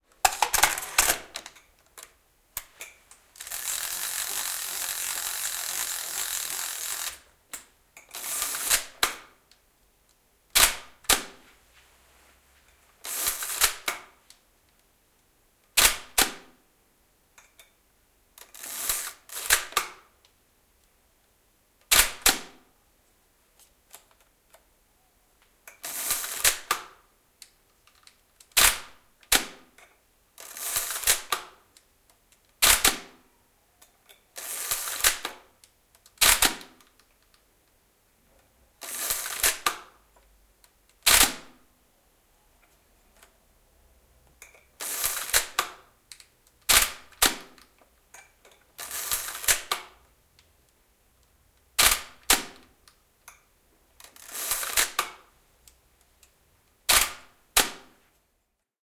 Kamera, kinofilmikamera / Camera, photo camera, film, shots, shutter, click, various speed, winding film, interior (Hasselblad 500 c/m)
Kinofilmikamera, järjestelmäkamera, laukaisuja eri valotusajoilla ja filmin kääntöjä. Sisä. (Hasselblad 500 c/m).
Paikka/Place: Suomi / Finland / Vihti
Aika/Date: 11.06.1981
Interior, Yleisradio, Yle, Valokuvaus, Filmi, Laukaus, Shoot, Soundfx, Laukoa, Film, Photo, Shot, Kamera, Tehosteet, Finland, Suljin, Shutter, Finnish-Broadcasting-Company, Camera, Photography, Suomi, Field-Recording